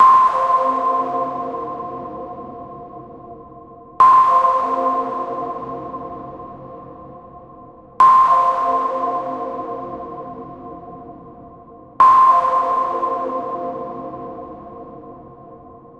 Fresh SFX for game project.
Software: Reaktor.
Just download and use. It's absolutely free!
Best Wishes to all independent developers.